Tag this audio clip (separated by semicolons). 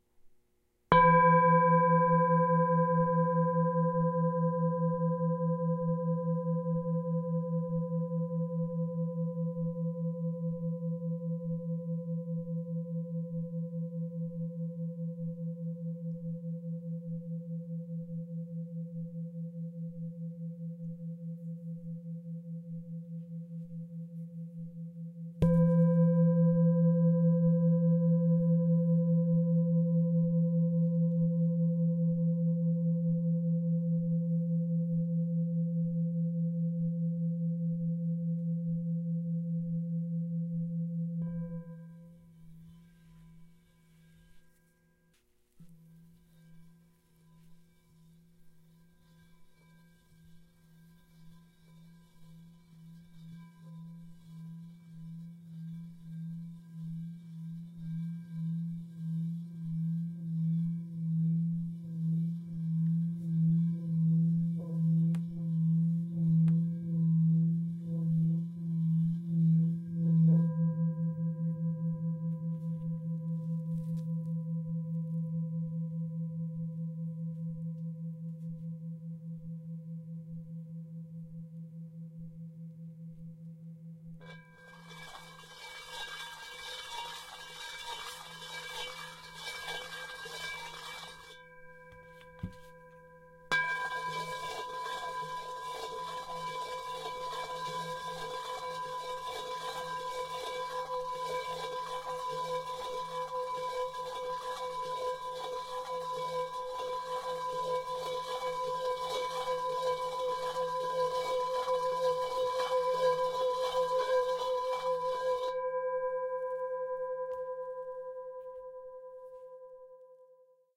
bell; bowl; brass; bronze; chime; clang; ding; drone; gong; harmonic; hit; meditation; metal; metallic; percussion; ring; singing-bowl; strike; tibetan; tibetan-bowl; ting